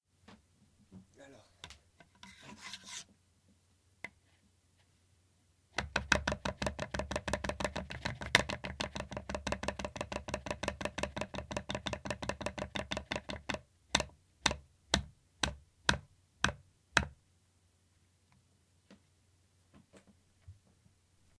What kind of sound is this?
Fr : Un couteau sur une planche de bois
En : A knife on wood.
Couteau, cuisine, kitchen, knife, wood